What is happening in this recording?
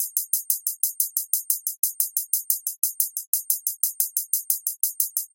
hi hat loop
hat, hi, loop